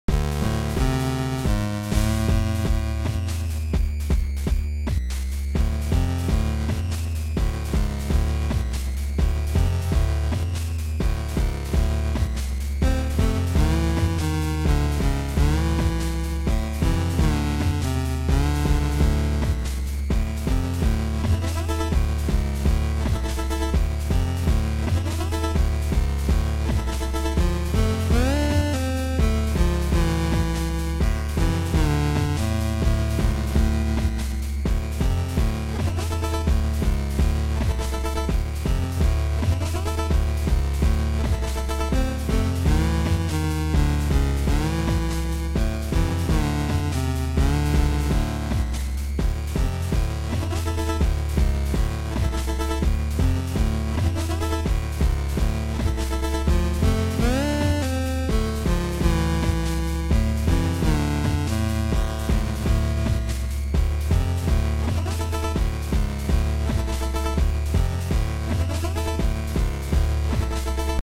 8-bit Brisk Music Loop
This is an 8-bit music loop made in Famitracker using the VRC6 chip expansion. The music loops from about 0:12 to 0:40.
battle, chiptune, video-game, music, video, retro, fast, loop, chip, brisk, 8-bit, game